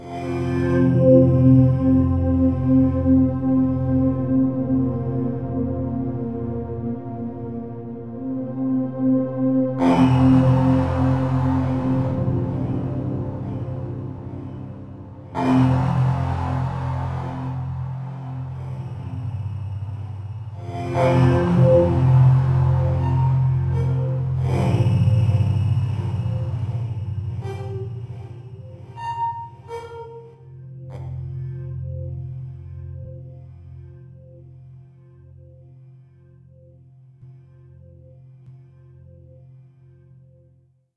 G Minor Chrush 4
Smooth, flowing synth pad sound.
minor, instrumental, reverb, dreamy, flowing, moody, haunting, music, atmosphere, loop, soundscape, synthesizer, background, g, smooth, ambience, synth